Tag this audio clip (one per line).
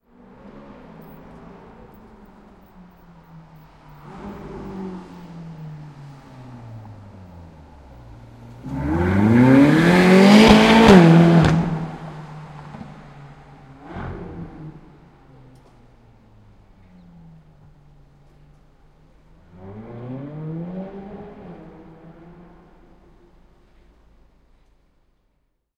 accelerate
accelerating
acceleration
ambience
atmosphere
automotive
car
city
engine
fast
Ferrari
field-recording
France
Lamborghini
motor
Nanterre
Porsche
race
racer
racing
road
speed
sports-car
street
supercar
town